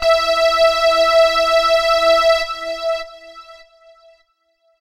Two hyper saw oscillators with some high pass & low pass filtering, heavy analog settings, some delay, chorus and comb filtering. The result is a very useful lead sound. All done on my Virus TI. Sequencing done within Cubase 5, audio editing within Wavelab 6.